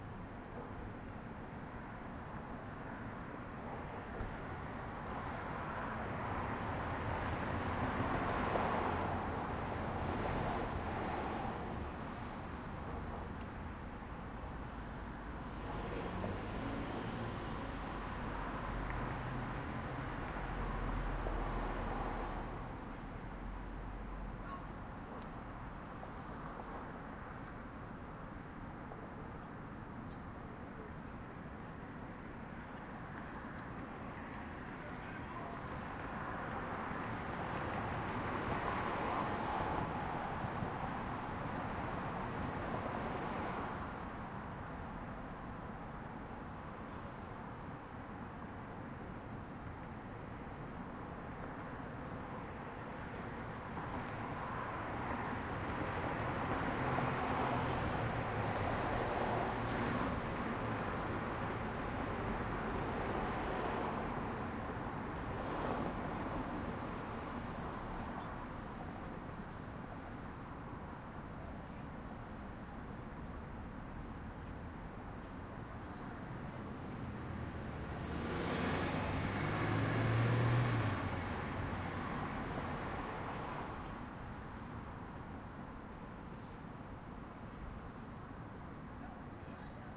City Night - Traffic, crickets, dogs barking, people
Mostly light traffic, but also dogs barking, critters, and people. Burbank CA (Greater Los Angeles)
Recorded through a window with a Zoom H5 using the stereo microphones that come with it.
I would still appreciate it if I could see/hear the project this sound file was used in, but it is not required.